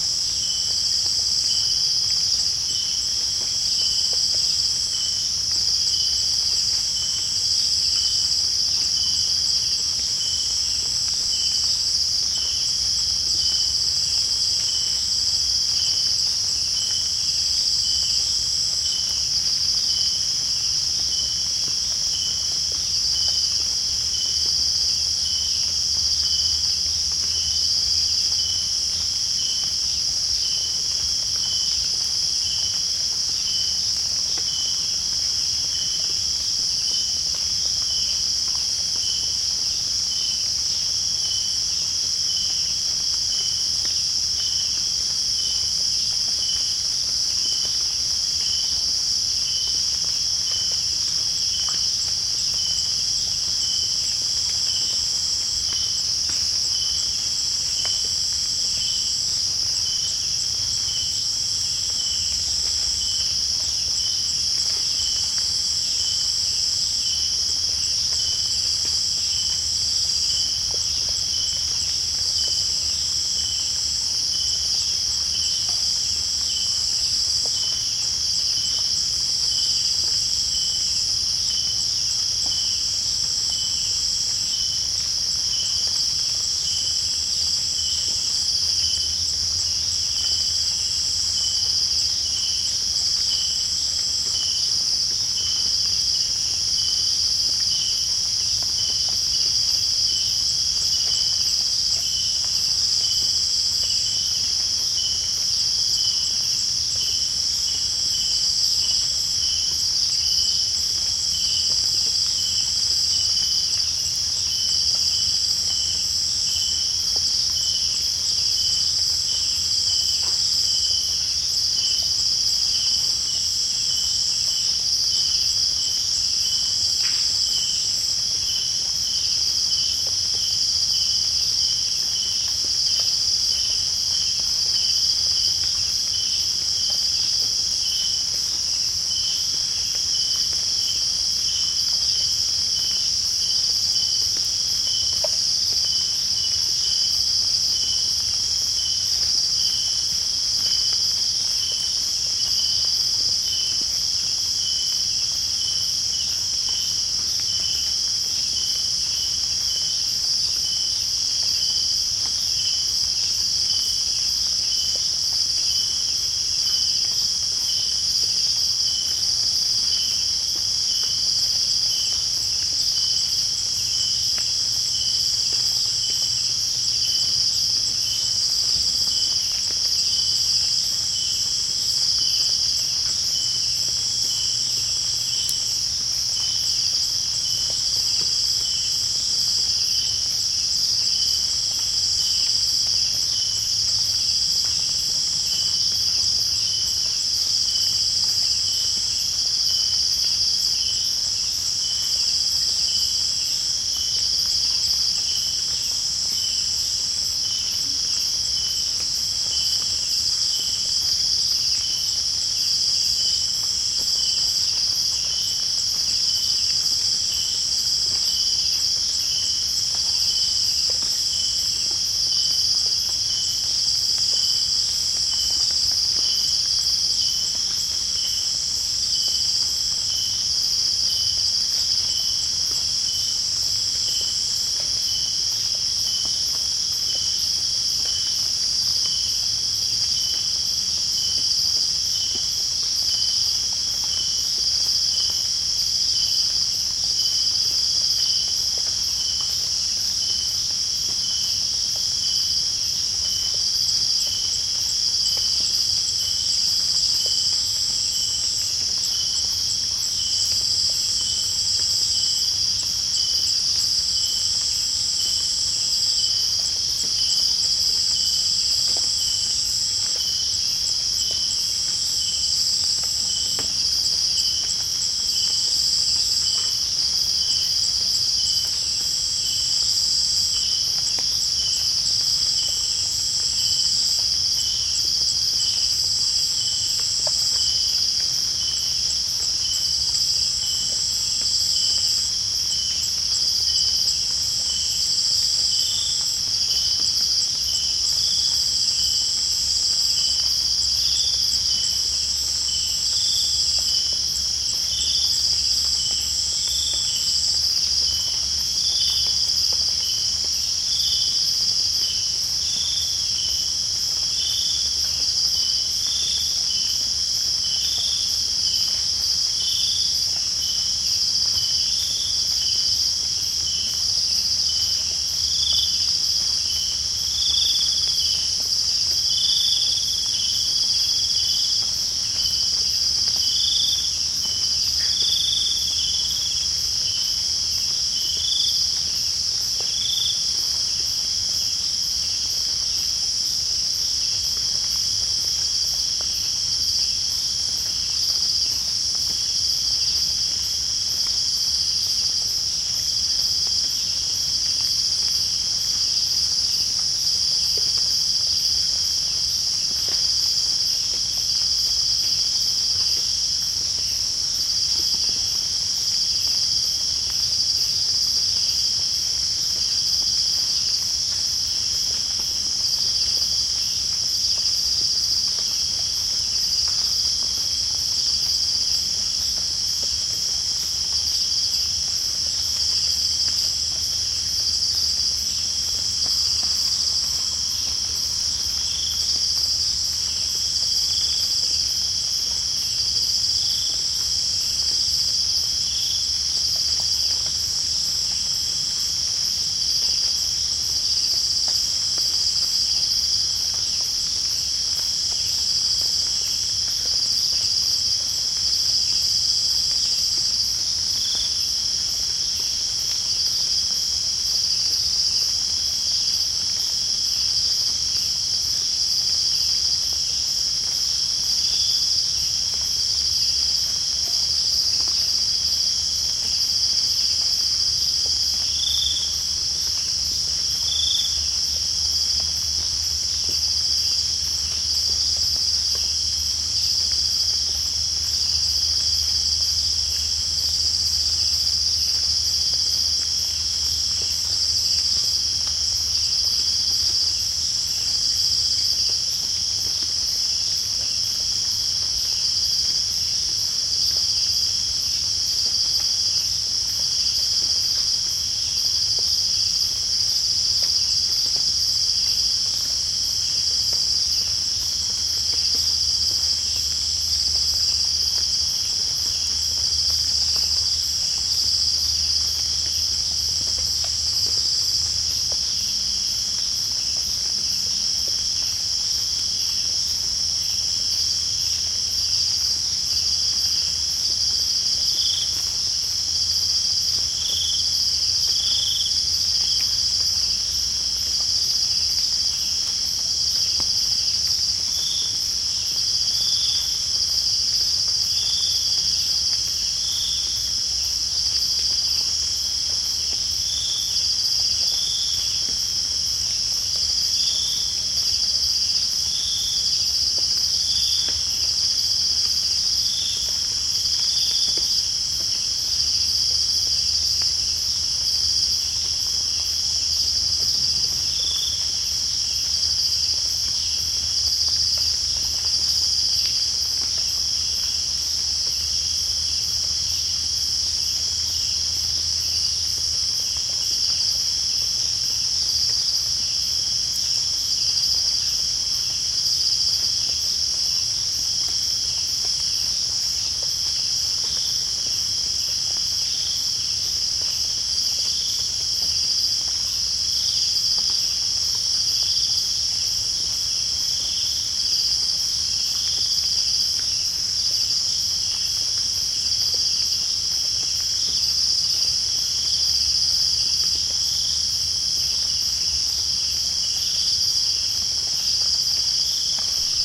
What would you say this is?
140930 night jungle nature ambience.Chiangmai Thailand. Cicades. Dew drops (ORTF.SD664+CS3e) 2
cicadas, rain, field-recording, nature, ambiance, thailand